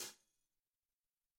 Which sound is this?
closed hi hat 1
Drums Hit With Whisk
Drums, Whisk, Hit, With